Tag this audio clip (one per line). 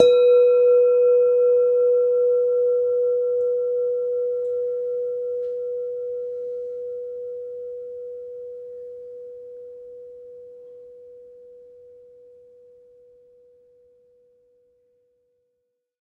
bong
ping
bell-tone
ding
bell-set
bells
bell
dong